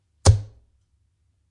Dart hitting board and other darts (close)